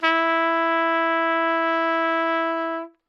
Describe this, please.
Part of the Good-sounds dataset of monophonic instrumental sounds.
trumpet, single-note, sample